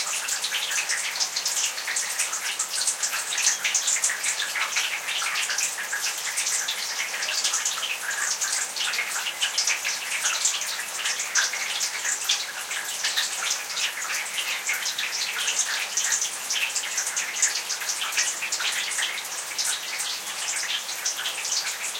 Rain Gutter 01
This are noises of a rain gutter during a rainfall. As this was recorded on my balkony there are strong reflections of the adjacent brick walls.
ambient, field-recording, gutter, rain, rain-gutter, ripple, weather